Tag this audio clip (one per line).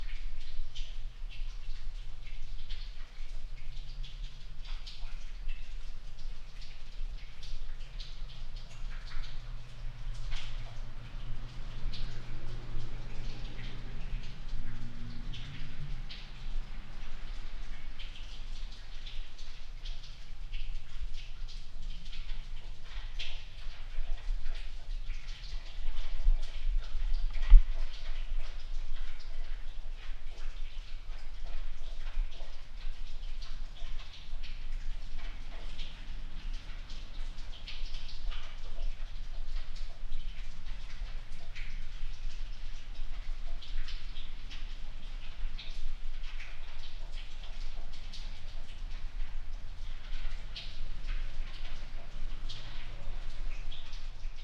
abandoned
ambient
Basement
wet